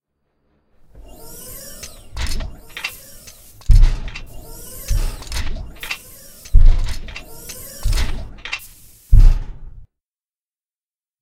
3-Legged Robot Walker
Here are the sounds I recorded:
- The "loose parts" sounds were a slightly unscrewed valve on a trumpet.
- The hydraulic leg-lifting noise was a hatch door opening on a van.
- The metal foot hitting the ground was me banging on a metal garage door.
- The humming engine noise (it's quiet) was a roll of duct tape spun on a wooden board.
- The various other clanks and pops were the same trumpet noises, just edited a bunch.
One day while playing the mobile game Crossy Road, I my sound being used for one of the characters. I'd love to know what kind of things it's being used in.
This is called "Three-Legged Robot Walker with Loose Parts" because it was a Foley assignment for my Sound Design course years ago. This was one of the obscure things the professor gave the class that we had to interoperate and create using only our own recorded/edited foley effects. The class voted on the best one, and mine turned out to be the winner.